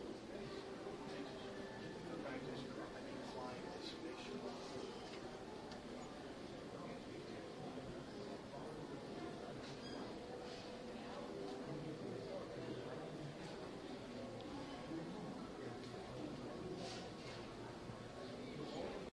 A strange group of people sit quietly in a room with a globe that shows the fascinating amount of precipitation on the planet.... really... not kidding. I waited a minute to see if anything was going to happen, it didn't.